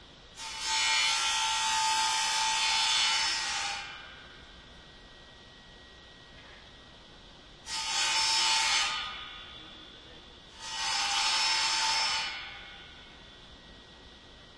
080925 00 grinder metal
grinder on metal
grinder, metal